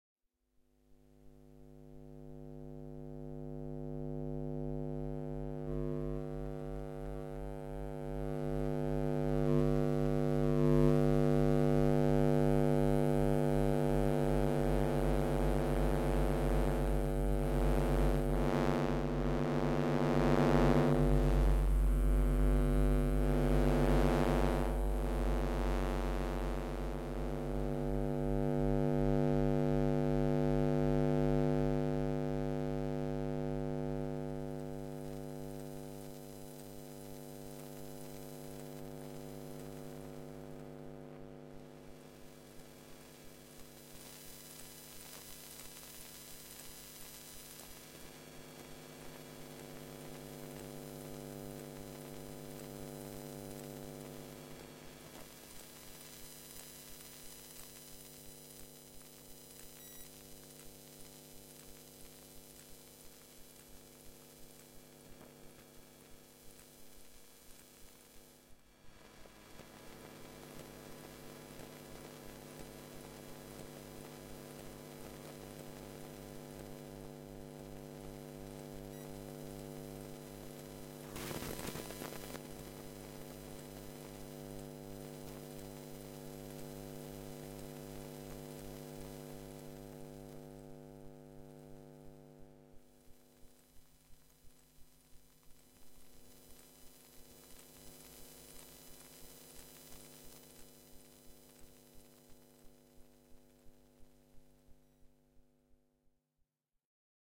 Desktop PC I
electronic, experimental, sound-enigma, sound-trip